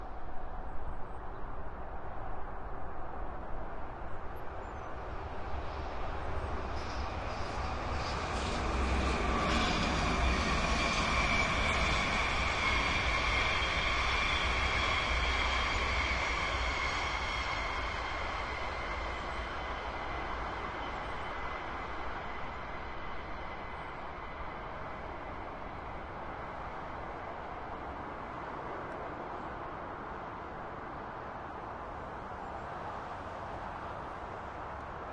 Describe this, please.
Dutch Train passing. Recorded near a motorway in the Netherlands, using the Sony PCM-D50 recorder.
field-recording, netherlands, train